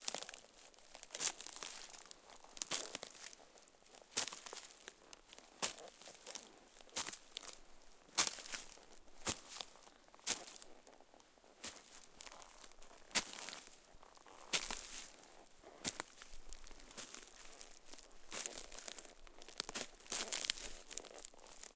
pine-forest--ww2-soldier--walk-in-place--twigs--cones
Soldier in World War II gear walks (in place) in a Finnish pine forest. Summer.
branches, field-recording, foley, forest, grass, metal, rustle, soldier